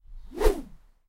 Raw audio of me swinging bamboo close to the recorder. I originally recorded these for use in a video game. The 'B' swings are slightly slower.
An example of how you might credit is by putting this in the description/credits:
The sound was recorded using a "H1 Zoom recorder" on 18th February 2017.